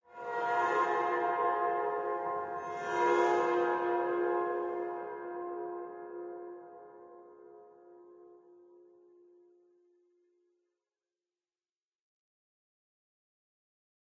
Bowed electic guitar - Fm9(11) chord (1)
Electric guitar played with a violin bow playing a Fm9(11) chord